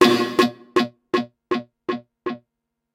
liquid snare echo
Snare made on a Maplin Modular synth processed with reverb and delay
snare, delay, hit, synth